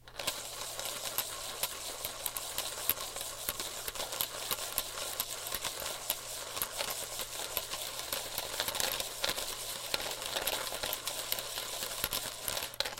coin bottle
rattling coins around in a plastic bottle